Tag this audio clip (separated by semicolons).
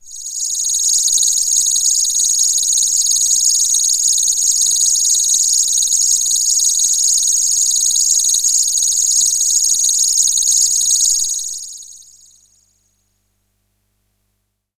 ambient; electronic; multi-sample; pad; space; space-pad; synth; waldorf